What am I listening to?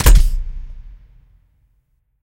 Big airgun, combined with added subbas and hall (sound needed bigger for the show). Recorded and mixed in Pro Tools. Different hit.